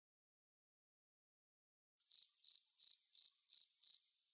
Recording of a single cricket very close, for good, clean signal-to-noise ratio. The cricket chirps 6 times in this sample. Recorded with small diaphragm condenser mics outdoors at night to a Sytek pre and a Gadget Labs Wav824 interface.